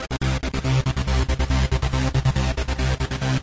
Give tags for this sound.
bass bassline beat progression sequence techno trance

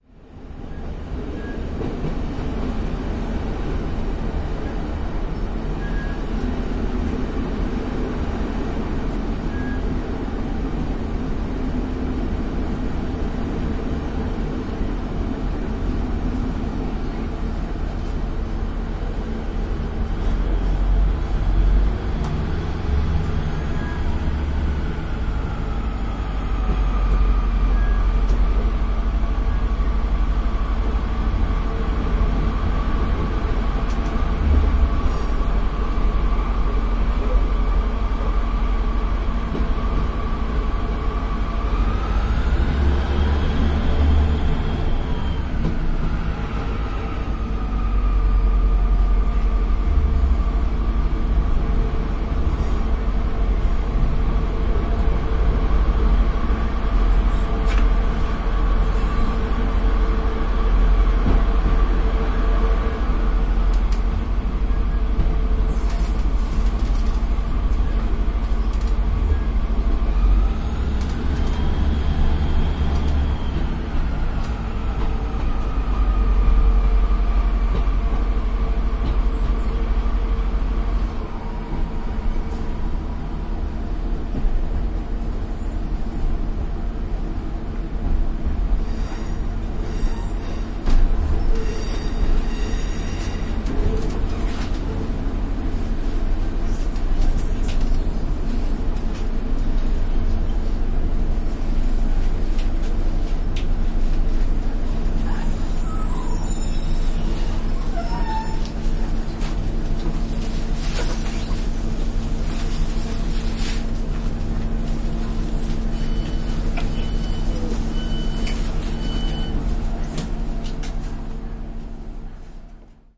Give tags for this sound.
interior brake diesel-train train diesel transport open opening engine door field-recording squeal brakes beep

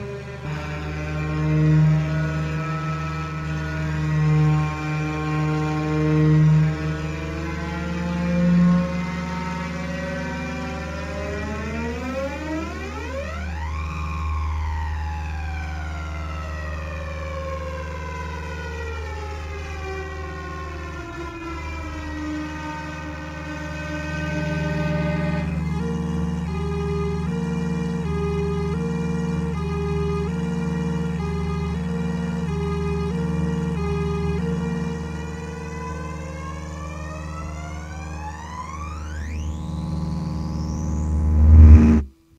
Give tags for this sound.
buzz techno distorted electro

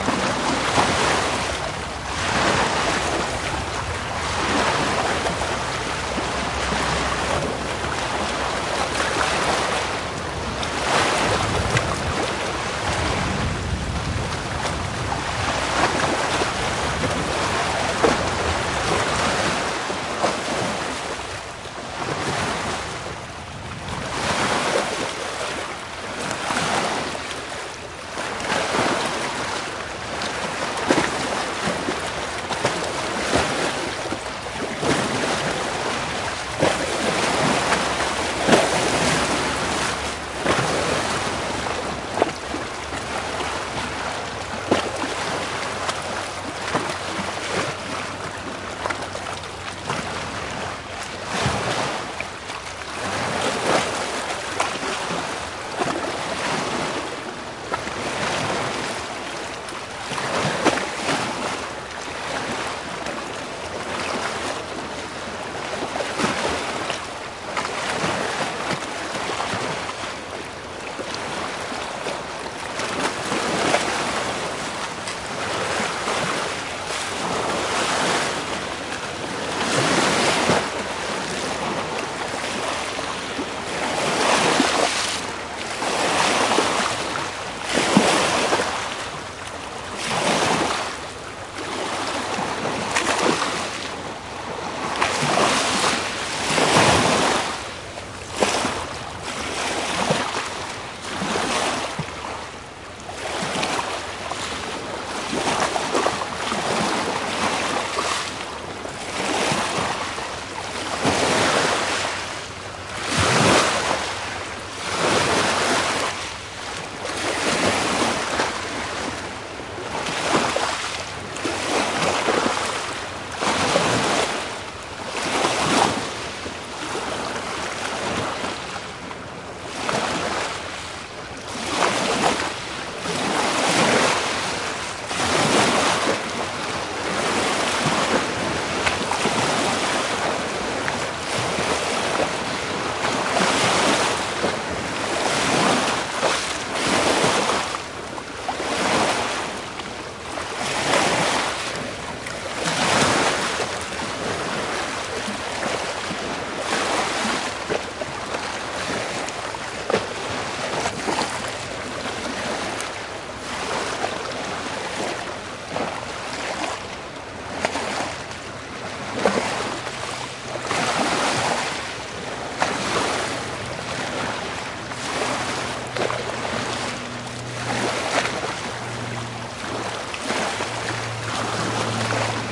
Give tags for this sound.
Humber Waves On Beach

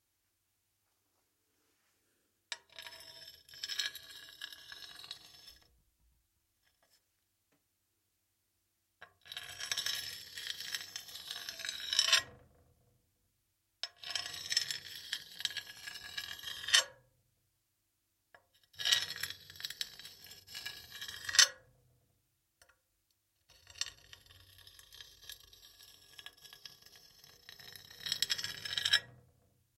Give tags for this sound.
Sheffield Mixes stone Digital Boyesen slow metal scrape light Alex Ed

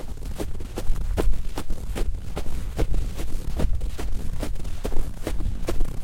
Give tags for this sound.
soft running snow

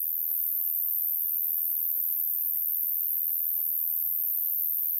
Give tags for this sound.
bugs crickets insects

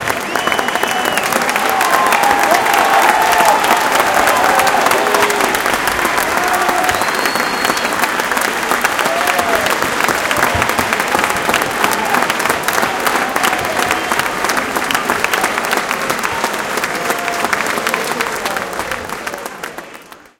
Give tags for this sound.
well-done hand-clapping sports clapping cheering winning positive win holler group celebration claps applause applaud people whistle cheer stadium audience congratulations clap whoop theatre applauding adults crowd hollering happy

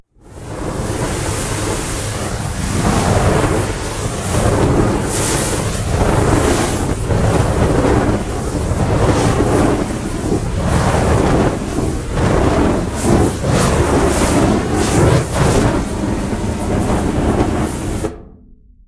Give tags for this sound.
reverb fire spray-can

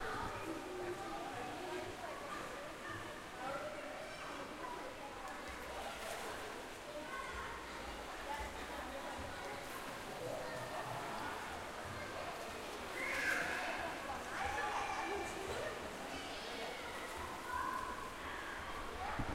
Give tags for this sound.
splashing kids reflecting sound play screaming

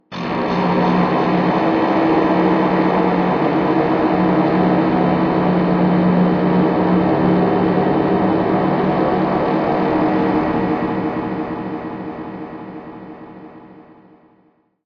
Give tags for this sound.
ambient creepy dark deep didgeridoo drama dramatic drone fade haunted horn horror phantom resonance scary suspense terrifying terror thrill transition wave weird

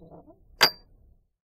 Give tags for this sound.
ring metal

